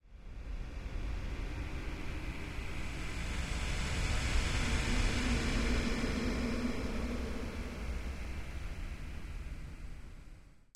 ghostly noise
creepy, ghost, sinister, scary